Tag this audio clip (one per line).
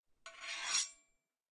metal
processed